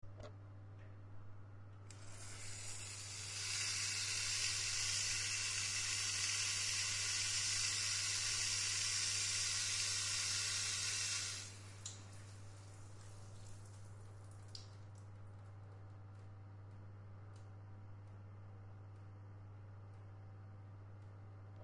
Here i recorded the shower running.
water, bathroom, shower